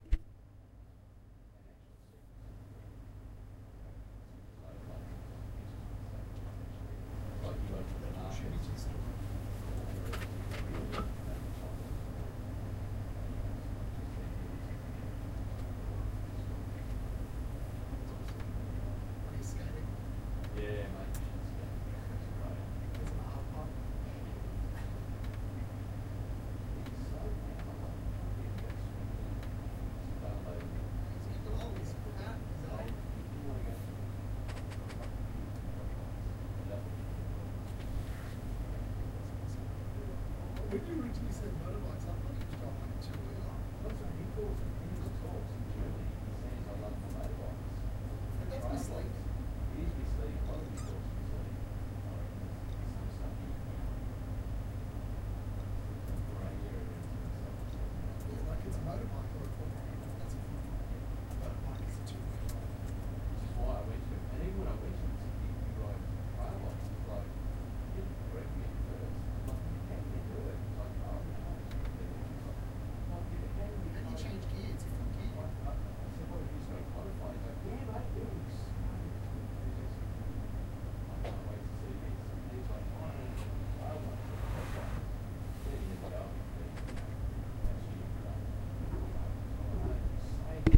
Edit Suite Atmos
Fans from HP z400 workstation, Macbook pro & Dell desktop, plus airconditioning & occasional mouse clicks.